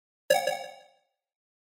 Bleep of electronic button made with free sound, pitch changes and verb